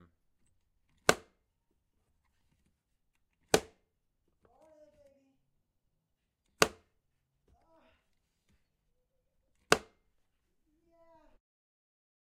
Wooden box lid soft slam
Wooden box soft slam: wood on wood, percussion. medium impact, noticeable percussion. Recorded with Zoom H4n recorder on an afternoon in Centurion South Africa, and was recorded as part of a Sound Design project for College. A wooden box was used, and was created by slamming a lid shut, but padding was used to make it softer.
box, close, closing, lid, owi, wood, wooden, wooden-box